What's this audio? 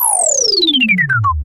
I generated alternating high/low frequencies produced in Audacity and pitch-shifted then down using the "pitch shift" option in Audacity. All my work.